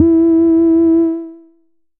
Basic saw wave 4 E4
This sample is part of the "Basic saw wave 4" sample pack. It is a
multi sample to import into your favorite sampler. It is a basic saw
waveform.There is quite some low pass filtering on the sound. There is
also a little overdrive on the sound, which makes certain frequencies
resonate a bit. The highest pitches show some strange aliasing pitch
bending effects. In the sample pack there are 16 samples evenly spread
across 5 octaves (C1 till C6). The note in the sample name (C, E or G#)
does indicate the pitch of the sound. The sound was created with a
Theremin emulation ensemble from the user library of Reaktor. After that normalizing and fades were applied within Cubase SX.
multisample, reaktor